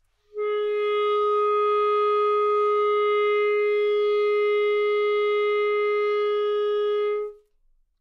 Part of the Good-sounds dataset of monophonic instrumental sounds.
instrument::clarinet
note::Gsharp
octave::4
midi note::56
good-sounds-id::602